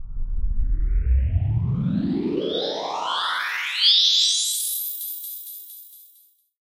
A magic spell was put on you! What a thrill!